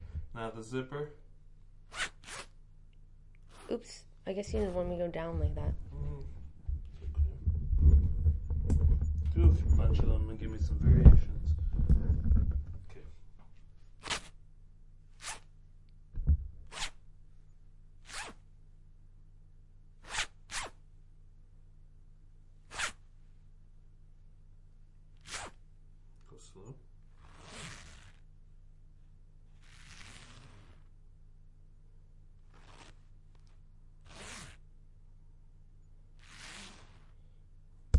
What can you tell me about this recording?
FX Hoodie Zipper LR
Zipper on a hood, up and down...
effects, recording, sounds